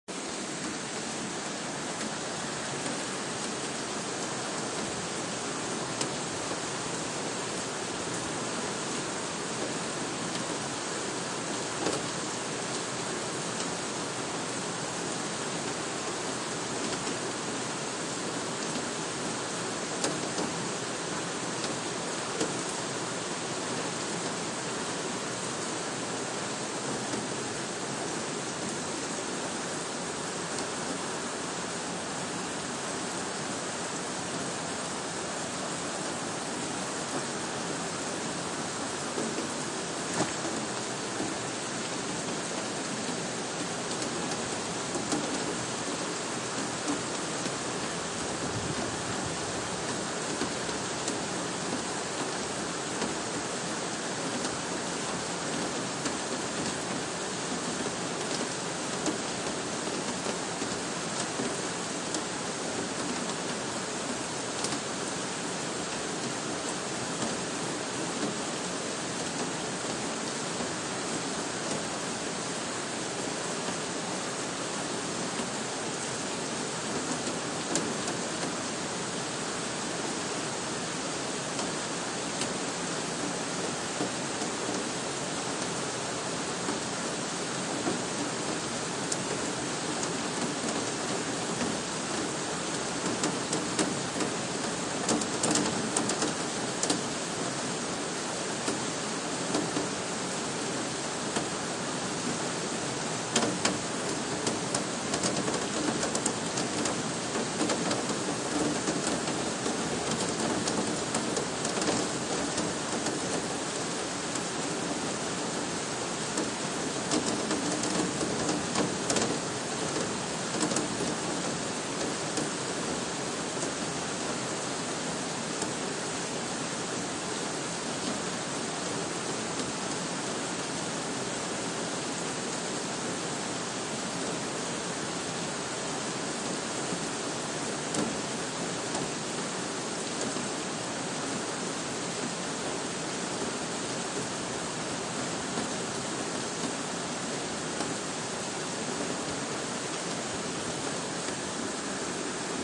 Rainy night
Recorded from my balcony. This is in a relatively quiet neighborhood. The loud tapping noise is the raindrops falling on the plastic shade of my balcony.
ambiance, ambience, city, field-recording, midnight, Mumbai, night, Rain, suburban, thunder